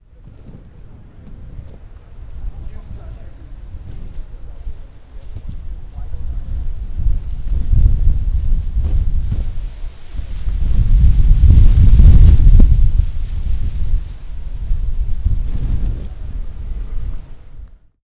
Viento Fuerte Hojas
Sound generated by the appearance of the air in outside which causes the movement of the leafs. Hard intensity level.
wind, scl-upf13, hard, leaves